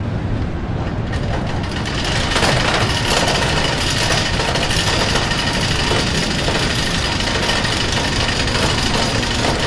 sound of a rollercoaster